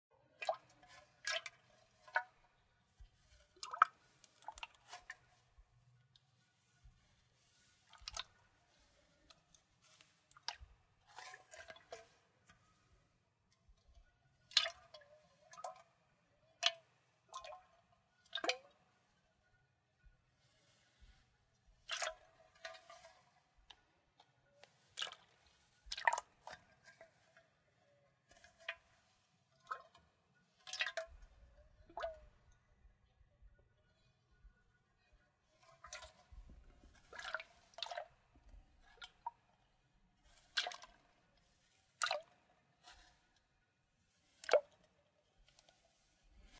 Recorded with MKH60 & SD442T, Metal Pocket flask
pocket, bottle, metal, flask, water